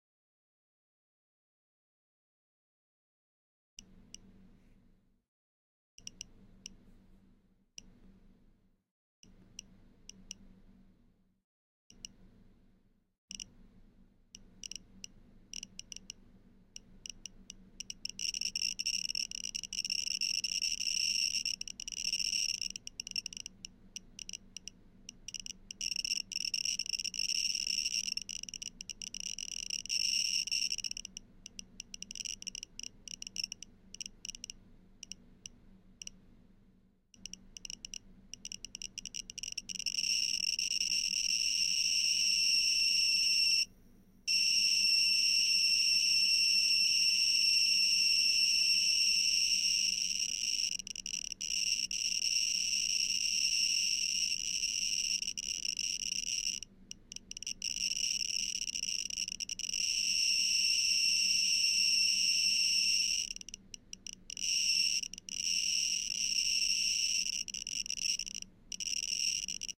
Geiger Tick Erratic
A Geiger counter ticks erratically.
Radiation Detector: Mazur Instruments PRM-9000 (analogue ticking)